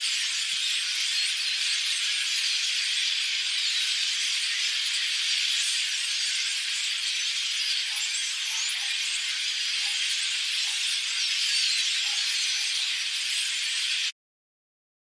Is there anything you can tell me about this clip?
Bird flock in a tree
A massive flock of birds had chosen a tree outside our house for their mid-day meetup. Recorded on iPhone 4s, processed in Reaper.